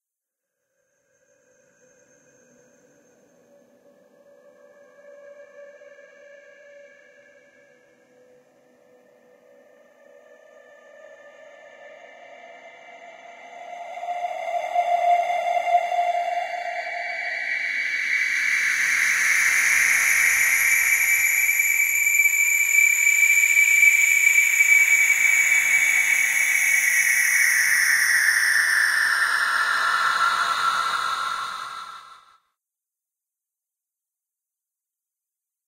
scary haunted scream voice
Just a little thing, a scream backwards and edited.
Please ask me via mail for Use.